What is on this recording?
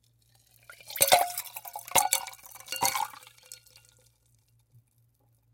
Pouring from martini shaker into glass with ice, liquid and ice clanking against glass